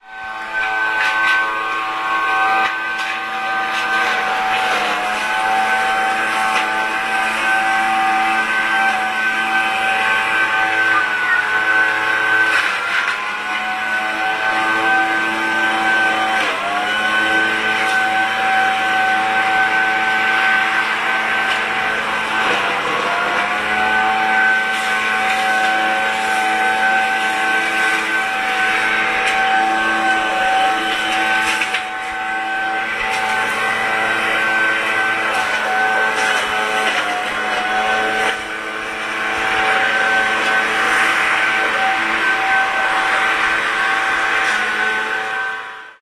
building hoover020710
02.07.2010: about 16.00. the noise produced by the "building hoover"/karcher. The noise from my parents neighbour who renovate his house. on the Karkonoska street in Sobieszow-Jelenia Gora (the Low Silesia region in south-west Poland).
building, field-recording, hoover, jelenia-gora, karcher, neighboring, noise, poland, renovation, sobieszow, street